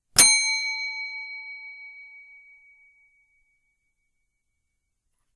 bell hotel service desk
service, bell, desk, hotel